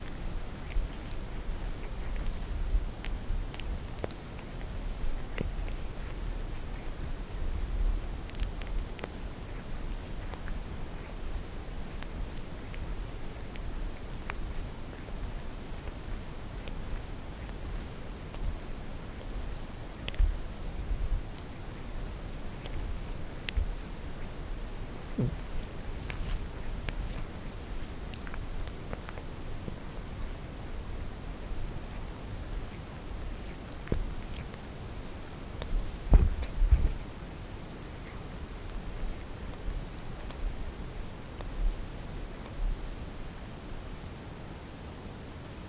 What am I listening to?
Cat liking herself
When you are a cat any time can be bath time and any room can be a bathroom, since you always carry your own personal hygiene kit containing an always-wet bath sponge (which also functions as a tongue).
bath, cat, lick, licking